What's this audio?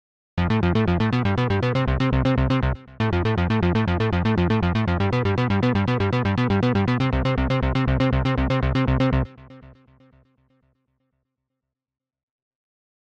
This was made by me as a level up sound effect. It can also be used for exciting moments or other important events in videos or video games. It has two tracks, one at a much faster tempo, and the second at a much slower tempo.
game, gaming, level, power, video, sifi, up, synth